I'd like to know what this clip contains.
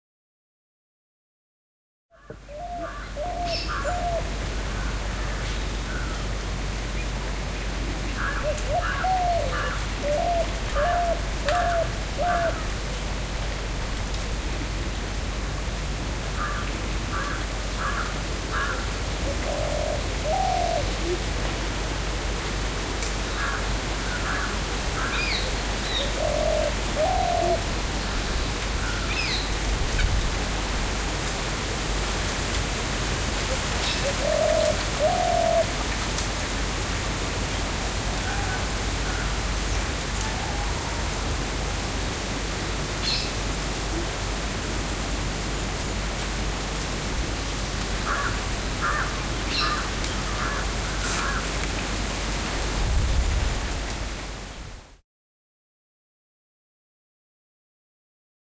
gentle breeze 3
Gentle Breeze
All the best.
Dharmendra Chakrasali
Field-Recording, Farm, Atmosphere